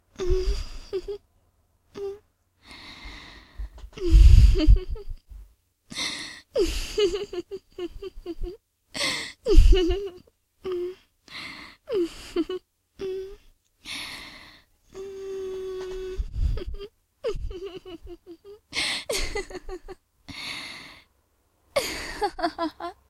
flirtatious laugh
female, flirt, flirtatious, flirting, Laugh, sexy